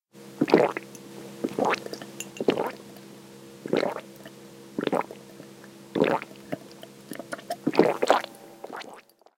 Gulping Water.
Drinking water, gulping. Recorded with iPhone 6, using the app SampleWiz.
I recorded this as I drank the water holding phone to neck. The app lets you save the sample and email it to yourself. Its saves the sound file as an AIFC. I then brought it into Adobe Audition CS6 to edit. Recorded on 11/3/2015 in Boston Ma. USA.
ah, drink, drinking, gulp, sipping, swallow, swallowing, water